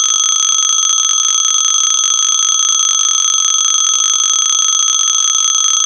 Six second old-fashioned school bell ring